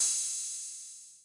TR-BOB HH Open
figure, kit